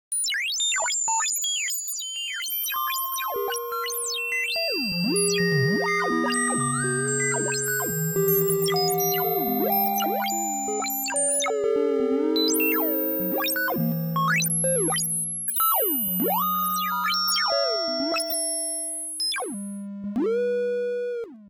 Also i'd like to see the project you're making.
Electro, FX, SunVox, Techno